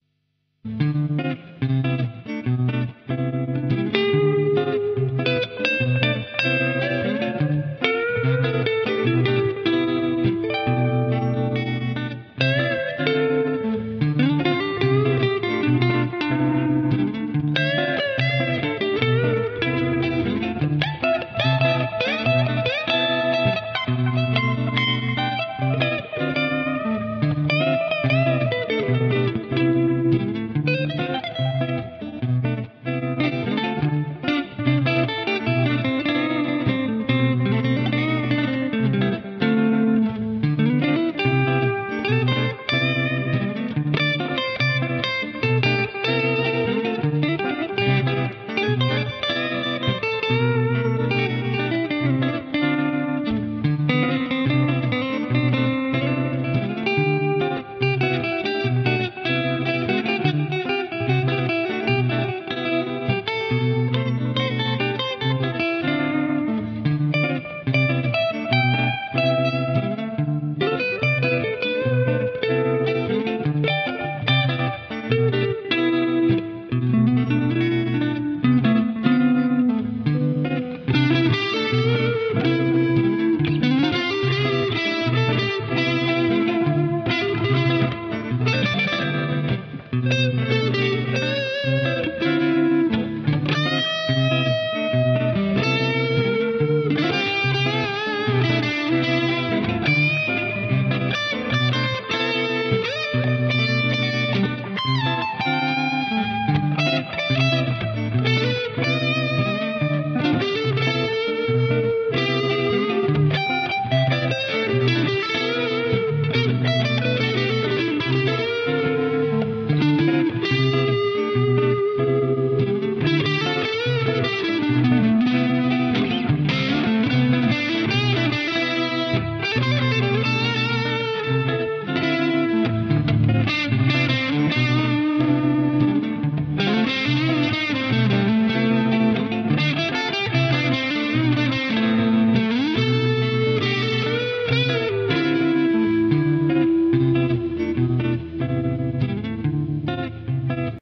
Dm Improvisation LooP
This is improvisation, record by guitar rig, whith using guitar pedals effects: tremolo, reverberation, overdrive. Improvisation played on loop-rhyphm, syncopation, seventh chords. I don know what the temp heare. This record made by spontaneously:)
looks like at jazz music:)
chord, electric, experimental, guitar, Improvisation, jazz, loop, overdrive, power-chord, reverberation, riff, rock, solo, syncopation, tremolo